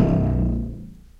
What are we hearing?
Buzzing Awl
Exotic Electronic Percussion32
electronic, exotic, percussion